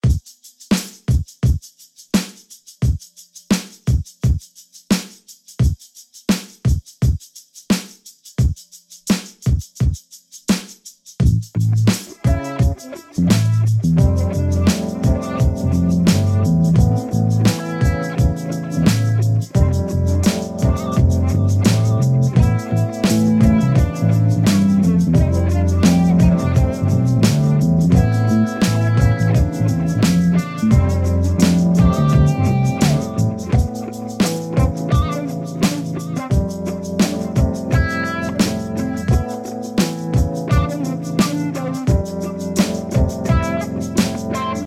groove rhodes
An idea that didn´t make the final cut using guitar and rhodes.
naumusiclab
hip-hop, loop